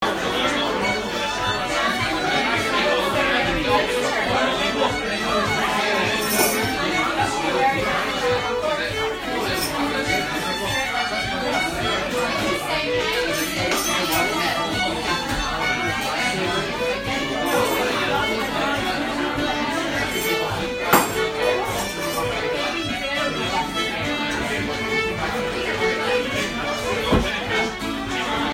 A nighttime pub in Northern Ireland. We hear the chatter of lively voices, with traditional Irish music playing in the background. A bit of rattling silverware as well.